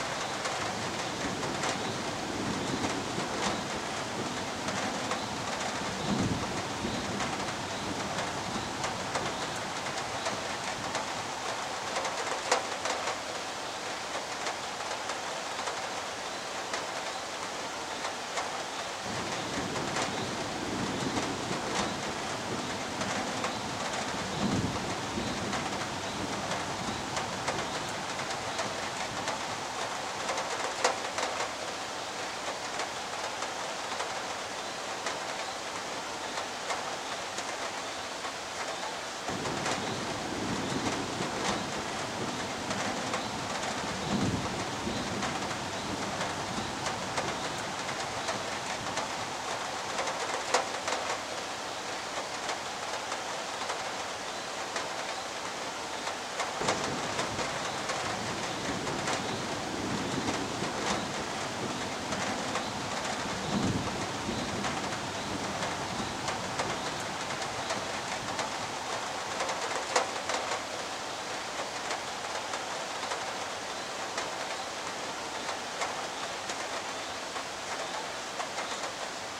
SE ATMO window rain drops distant city
recording made from the window
mic: AKG Perception 150